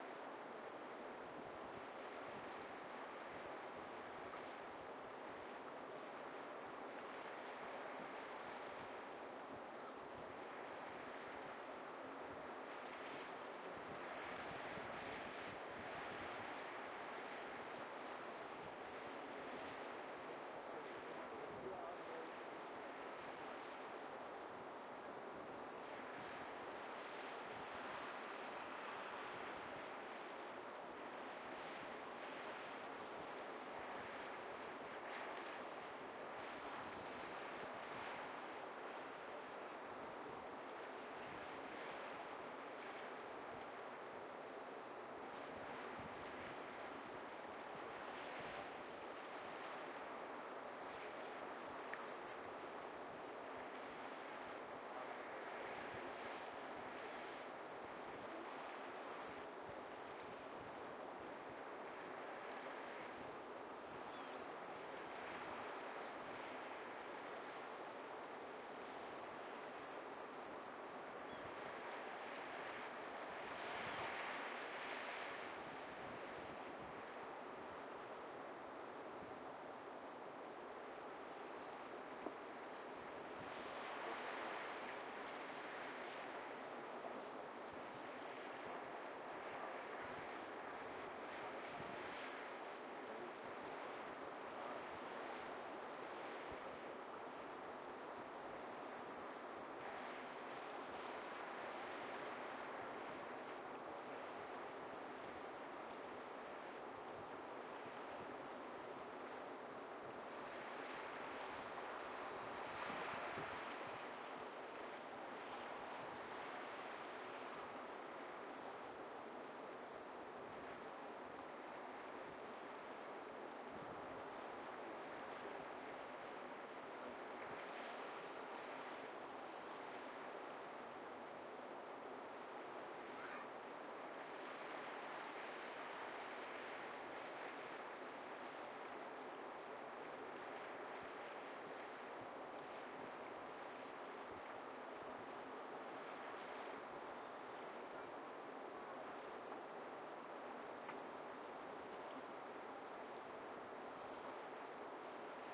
The sound of waves off the coast of Garður. Recorded near the Garðskagi Lighthouse.